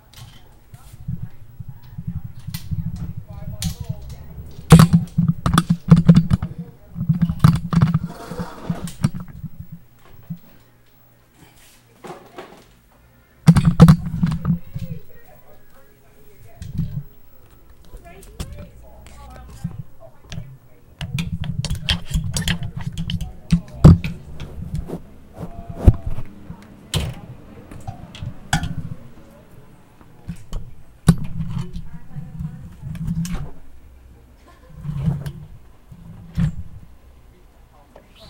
Snippet 1 of the USB mic stand banging around during setup on stealth recording recorded straight to laptop.